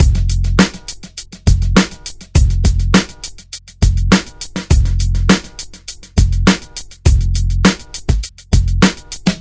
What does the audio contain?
102 break away clean
Another 102bpm clean unaffected break created in Jeskola Buzz :)
bass, beat, drum, hop, kit, loop, old, punchy, sequence, skool, sub